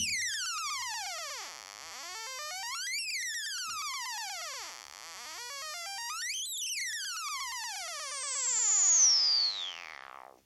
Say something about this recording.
Sound effects created with Korg's Monotron ribbon synth, for custom dynamics and sound design.
Recorded through a Yamaha MG124cx to an Mbox.
Ableton Live